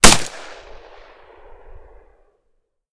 Firing Singleshot Rifle 1
Field recording of a rifle # 3.
gun, FX, rifle, weapon, Firearm, shot, shooting, firing